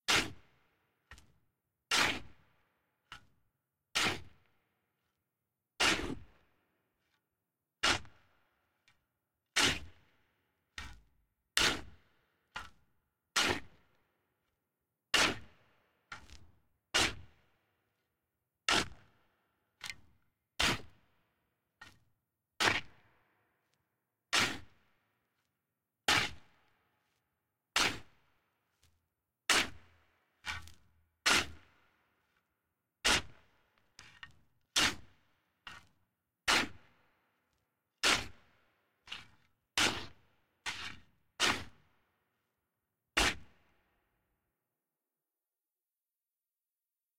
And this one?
Digging in dirt and gravel with a metal shovel. Used in a production of Dracula.
digging, dirt